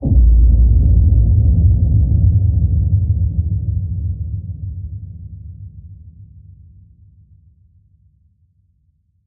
Atomic Bomb
Huge kick/explosion like sound..
Bomb, Explosion, Atomic, Huge, end, Low, Atom, Bassdrum, Kick, Reverb, cinematic